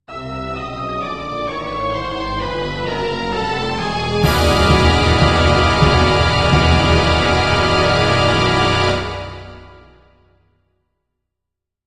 So I decided to create a few failure samples on a music-making program called Musescore. These are for big whopper failures and are very dramatic - they may also be used for a scary event in a film or play. It includes a short buildup and a loud dissonant chord at the end to create suspense and horror. For this project I used violins, violas, cellos, double basses, timpani, cymbals and brass. Enjoy!
big, drama, dramatic, error, fail, failure, game-over, horror, loss, mistake, negative, orchestral, scary, whopper, wrong